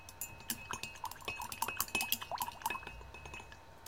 Stir in glass mug
Stirring in a glass mug. Recorded and edited in Audacity.
Bizinga
audacity, cup, glass, mix, mug, stir, stirring